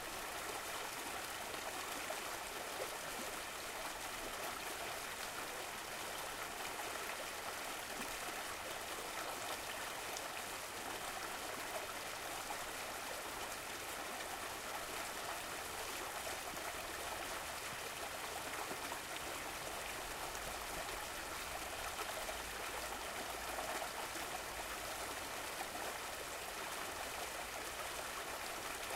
Stream, Running Water | Aviemore Scotland
Relaxing water sounds of a gentle natural running river stream recorded in Aviemore. Nature Sounds of Scotland.
Track Info:
Title: Running Stream Aviemore
Genre: Nature
Mood: Relaxing
flow, flowing, nature, relaxing, river, running, small, stream, trickle, water